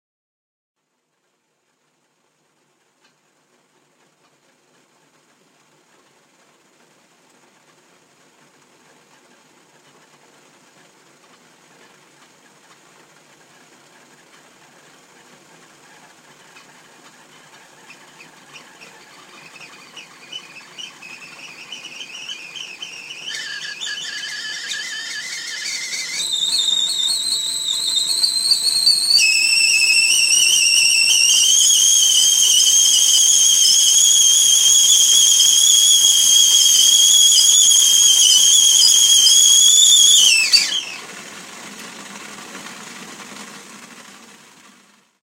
Tea kettle coming to full boil whistle, used it for background kitchen sound.